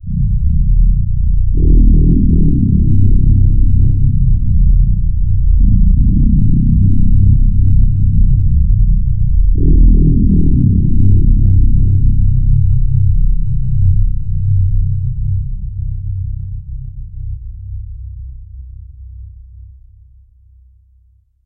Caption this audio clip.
lf-tones 2
Combination of the low frequency bass sounds. Re-verb and other effects added to create a dark and serious mood.
low-frequency, bass, sinister, tones